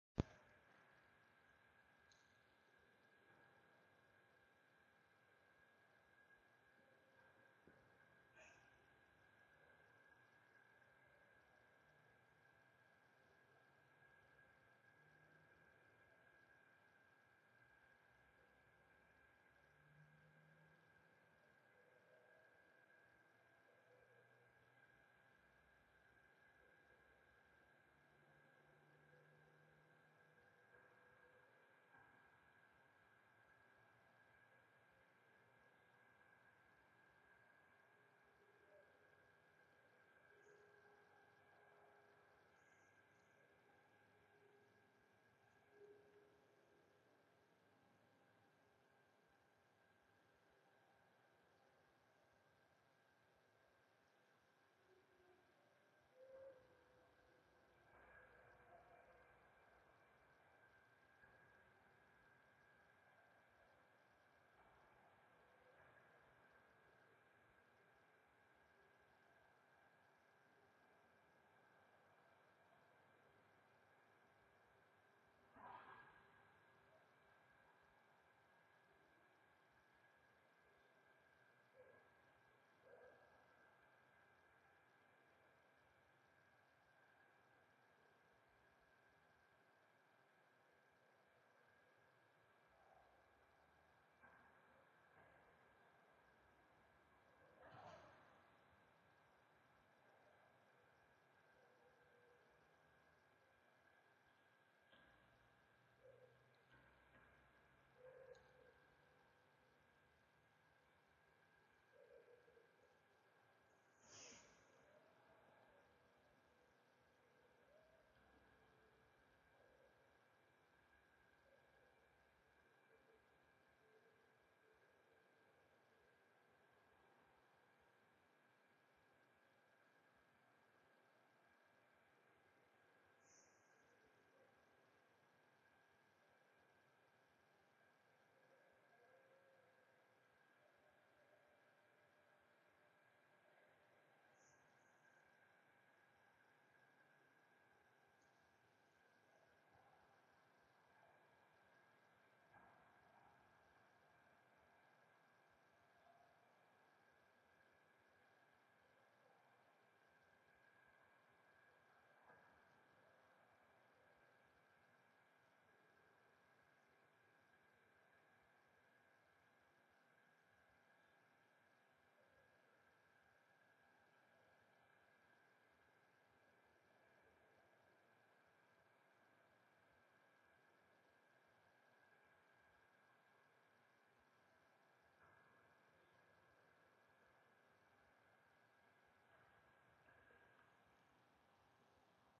Ambience of a suburban night.